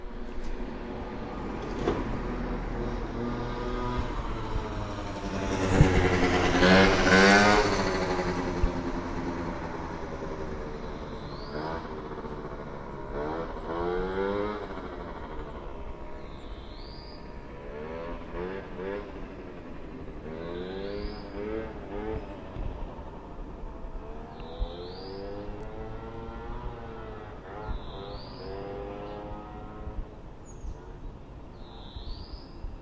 Moped pass by beside home. Recorded from ventilation window with Zoom H1n. Amplified with Audacity to -3 dB, no other editing.
city, field-recording, moped, street, traffic